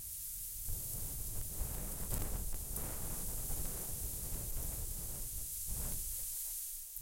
Wind with EQ
A recording of wind using the Zoom H6 with the included XY mic. The EQ has been changed to try to make the wind sound more mechanical.
nature, wind, stereo, field-recording